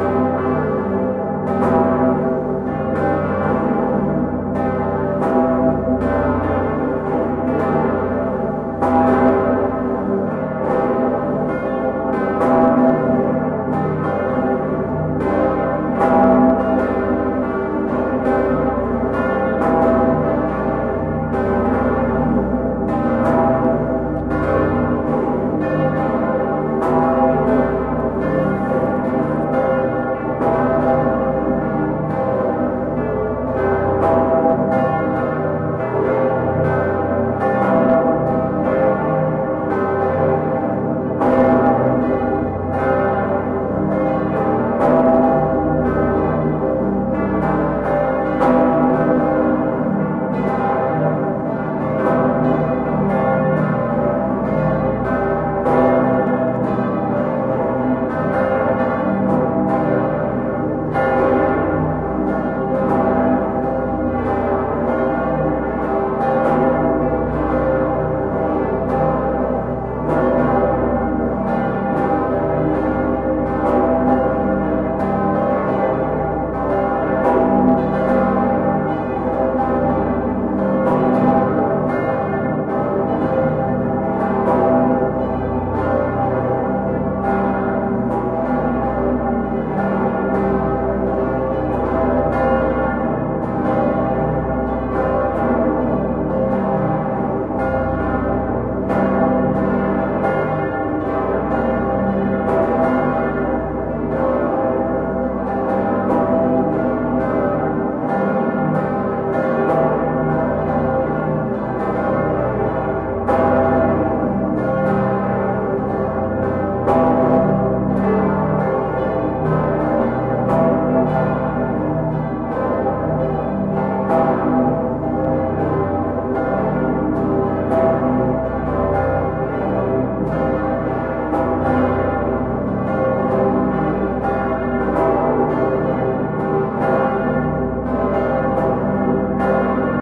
Kölner Dom Plenum
This sound was recorded in Cologne Cathedral, december 7 2010(Peterglocke,pretiosa,speciosa, ursula,aveglocke and kapitelsglocke).Videotaped and edited to make it sound(record it the video myself with a blackberry phone!)
plenum, vollgel, ut, Cologne, bells